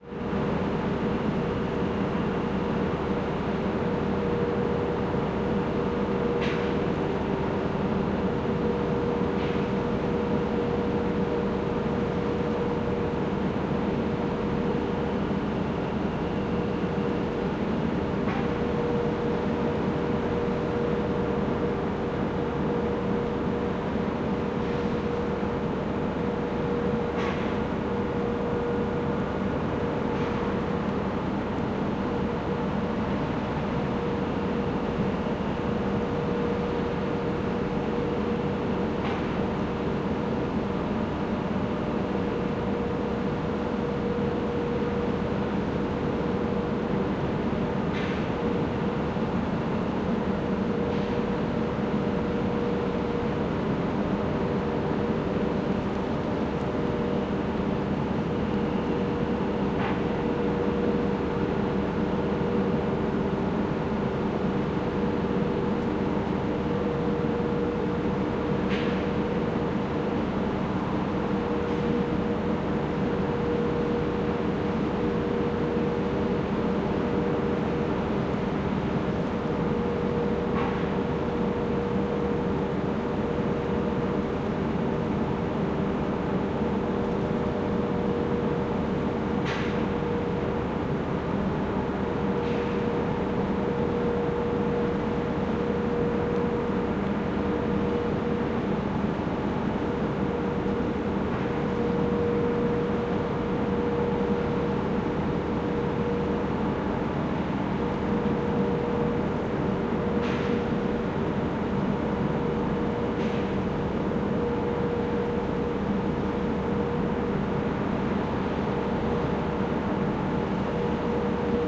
The flour milling factory at night recorded from the opposite bank of the canal just north of Brussels. Imagine grain silos, windows lit in the brick wall building. AT825 to Sound Devices 702. Normalized to -12dBFS.